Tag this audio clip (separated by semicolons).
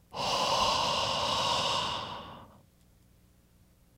air; breath; breathing; human